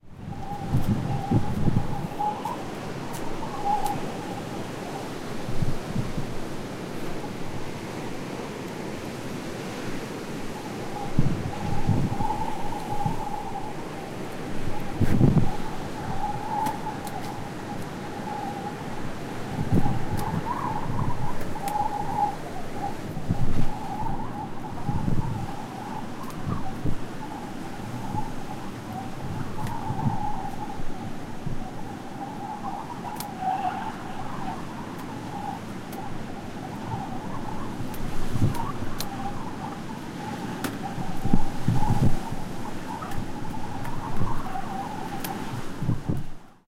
Strong wind whistling trough a closed restaurant awning, near the beach. Sea waves at the background. Recorded with a minidisc, stereo mic and portable preamp.